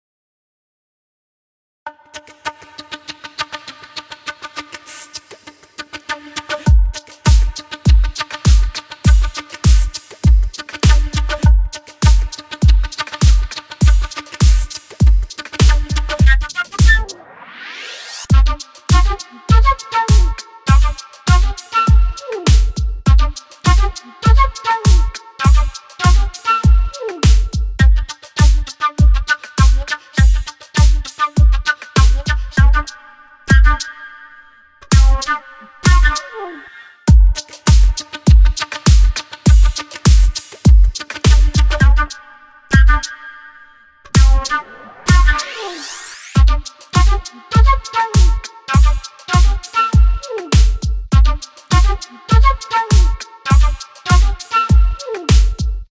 Flute mix
mix, flute, music